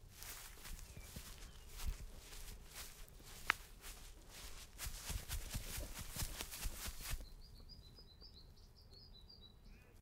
Walking on grass